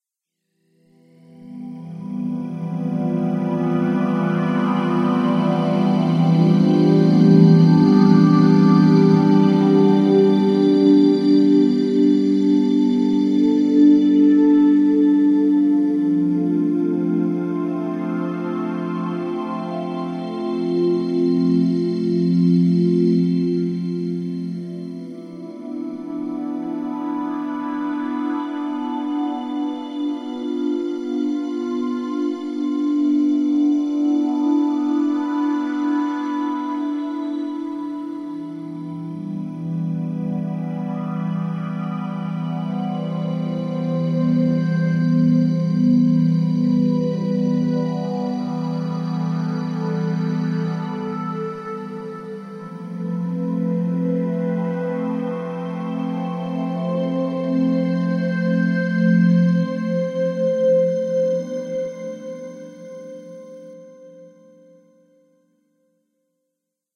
Mainland is a theme for movie background and narration. I create with FL 10 Studio and a midi Korg keyboard.
Atmosperic, Ambiance, Sound-Design, Fantasy, Films, Environment, Scene-Sound, Backgraound